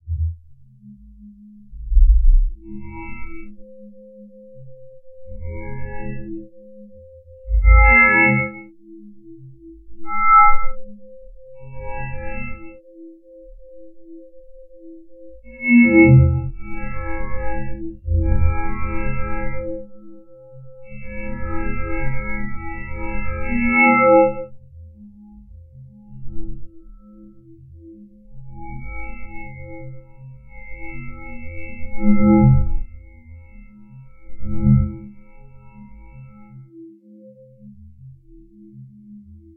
Wobbling soundscape
I genuinely can't think of anything to say to describe this sound....It's a weird synth noise.....
converted-bitmaps, creepy, electronic, experimental, glass, soundscape, synth, weird, wobble, wobbling